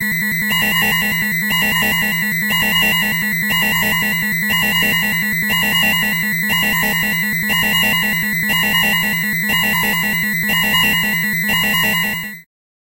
Emergency 2 (Medium)
Two pulses of manic urgency laid over the manic beeping of a manic computer. Yes, it's an emergency alarm. It plays for a somewhat short period of time.
alarm, beep, beeping, emergency, urgency